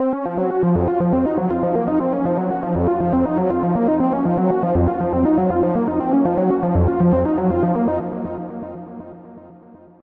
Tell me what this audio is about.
Kalm B4 The Storm
beat, drum, kickdrum, sequence